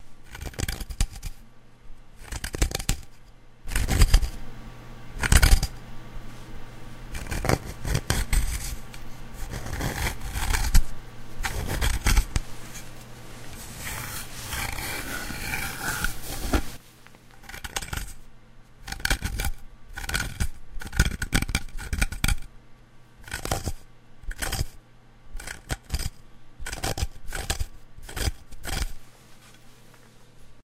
rasguños madera
Grabación de rasguños en madera. Field-recording wood scratch
madera, rasguo, scratch, wood